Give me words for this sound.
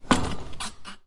snd ImpactMediumWall03
metal impact of a wheelchair with wall, recorded with a TASCAM DR100
wall, impact, wheelchair